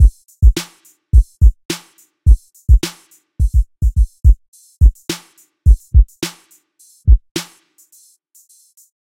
On Rd Bruce 4
Taken from a our On road Bruce project, made to go along with a slap base line. Mixed nicely
hip-hop, kick, on-rd, On-Road, thumpy